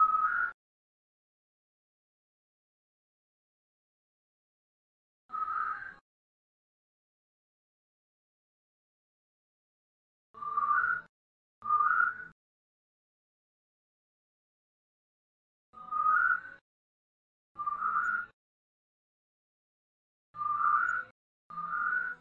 Intresting Bird Call:
A bird created by whistling. Recorded with a (BLUE Microphones, Snowball ice) The sound was postprocessed to make the bird sound more interesting, for jungle soundscapes. Used Audacity for both recording and processing.
Recorder: realtek High Definition Audio soundcard
Microphone: BLUE Microphones, Snowball ice
processing: Testing different effects for the right result